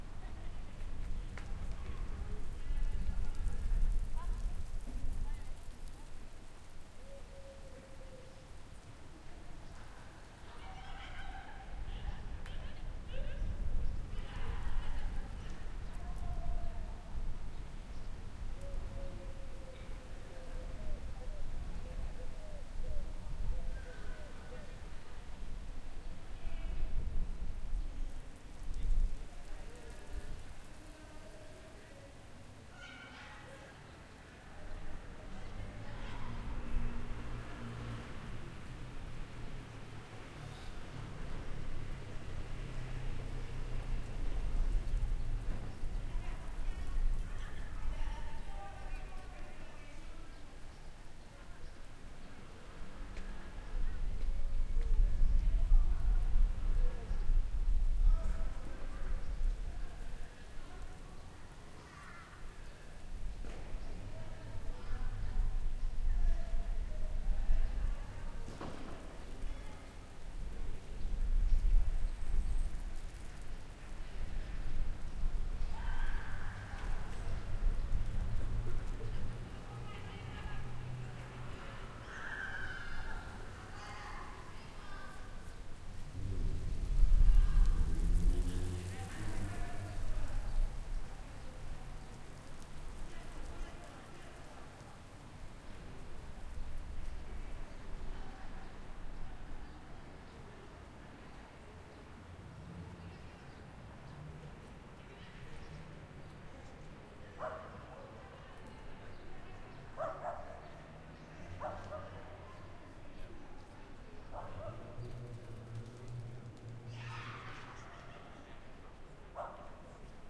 Calidoscopi19 Parc Can Fabra 2
Urban Ambience Recorded at parc Can Fabra in April 2019 using a Zoom H-6 for Calidoscopi 2019.
SantAndreu, Simple, Nature, Calidoscopi19, Monotonous